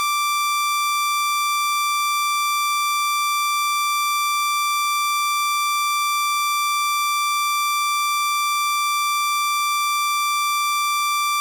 Doepfer A-110-1 VCO Saw - D6
Sample of the Doepfer A-110-1 sawtooth output.
Captured using a RME Babyface and Cubase.
multi-sample, waveform, slope, analog, synthesizer, falling-slope, raw, sawtooth, oscillator, sample, negative, wave, saw, analogue, Eurorack, A-100, VCO, electronic, modular